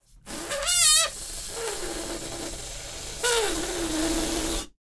Tractor desinflandose
a noise of a ballon
noise, balloon, tractor